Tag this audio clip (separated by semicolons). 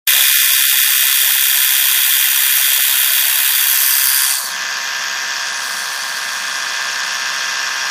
cleaning; domestic; electric; hygiene; machine; motor